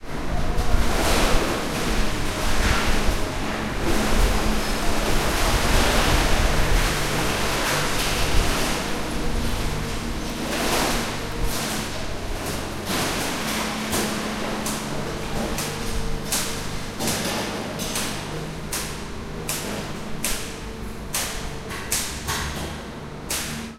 At the punt de reciclatge on Ramon Turro in Barcleona. Standing just inside the warehouse door as they sorted the waste with their machines.
Recorded on a Tascam Dr-2D.
Campus-Gutenberg, Dr-2D, ecological, Engineering, industial, machines, recycling, Tascam, technology